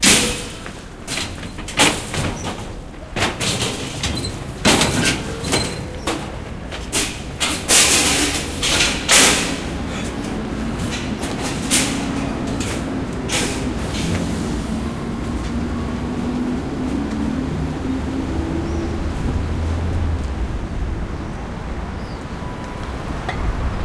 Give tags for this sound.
canceles
iron

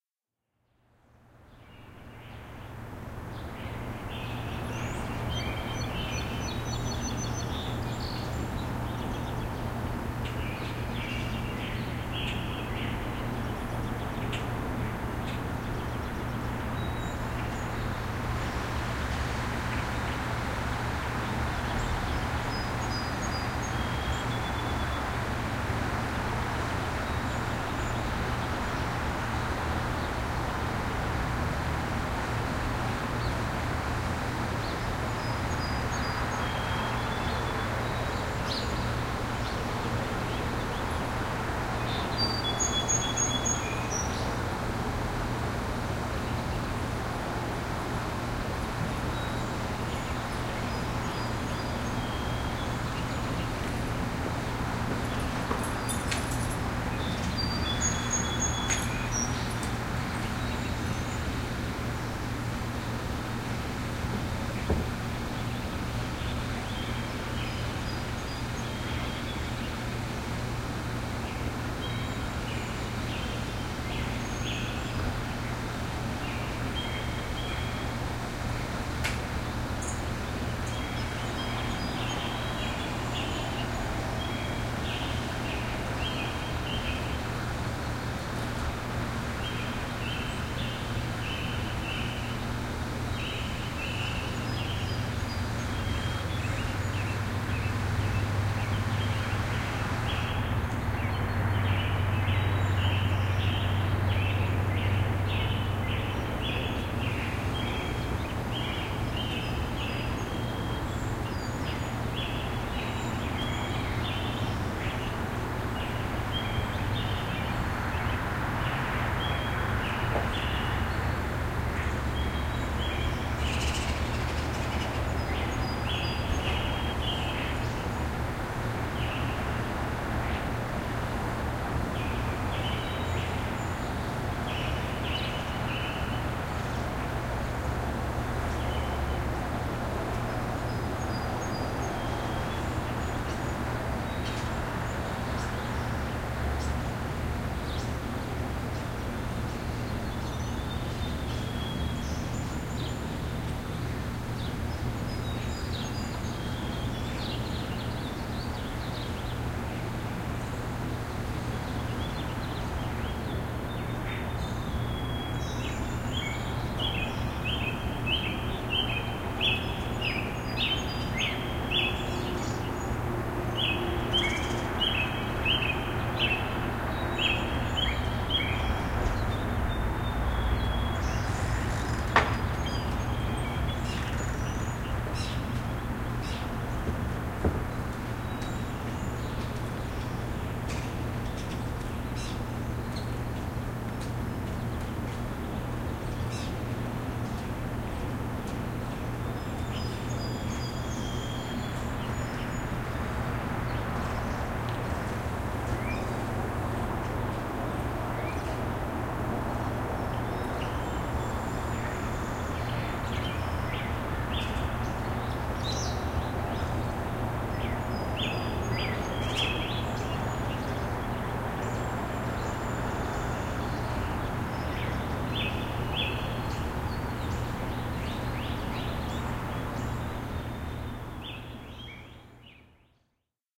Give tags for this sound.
ambience
birds
cars
morning
nature
traffic

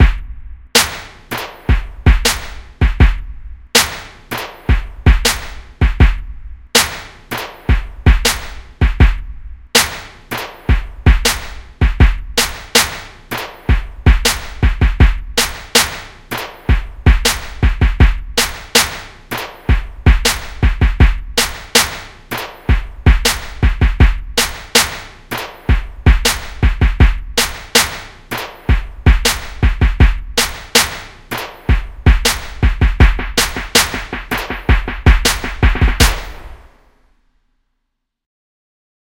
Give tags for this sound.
4x4-Records
Aggressives
Clap
Drum
Drums
FX
Hat
Hi
Hi-Hats
House
Kick
Loop
Nova
NovaSound
Snare
Sound
The

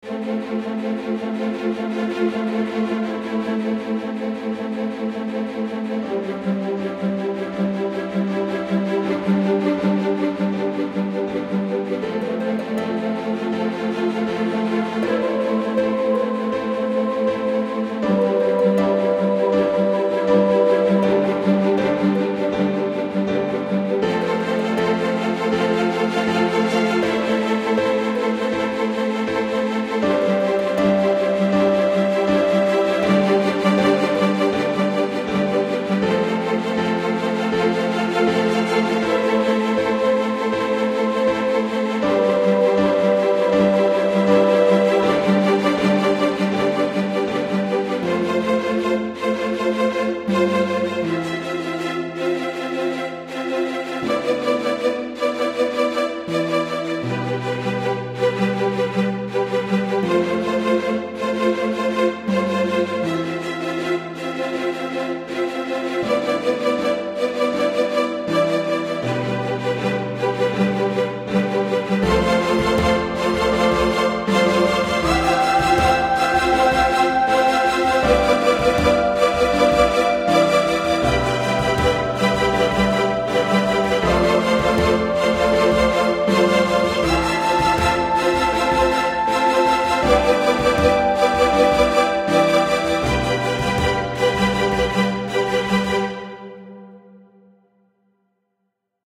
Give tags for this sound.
choral
cinematic
classical
electronic
ethnic
experimental
first-nations
instruments
music
native
neo-classical
singing

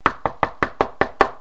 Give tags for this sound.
desk
knock
slam
table
wood

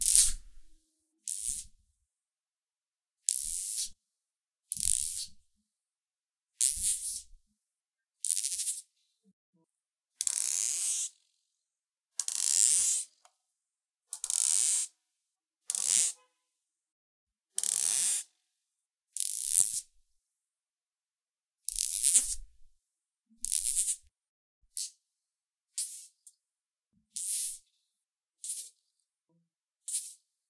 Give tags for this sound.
effect
experimental
metal

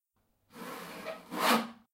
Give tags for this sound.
DIY
one-shot
saw

